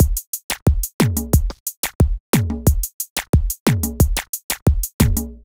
A wheaky drum loop perfect for modern zouk music. Made with FL Studio (90 BPM).